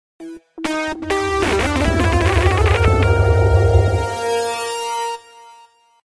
future organ
futuristic soundclip, watery space organ
organ, shimmer, future